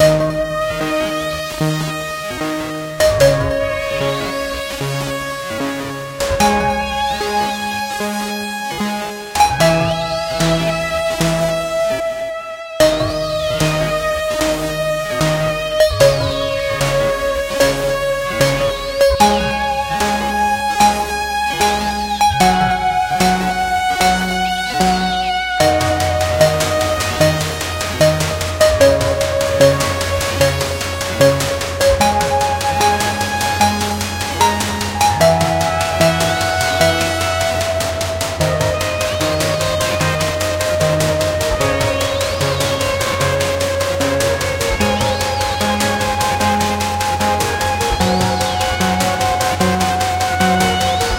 Has a kind of gentle urgency about it.
I call it a "music short" (just under a minute) not sure if there is a better way to tag, probably suitable for game sound . but do whatever you like with it!
gaming, loop, music, short, synth, synthesiser